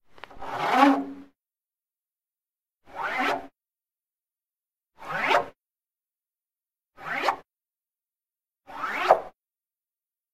JBF Finger on speaker coverEdit
finger speaker cover
cover, finger, speaker